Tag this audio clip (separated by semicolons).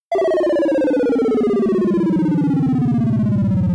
faster; score; bleep; high; computer; high-score; beep; descending; bloop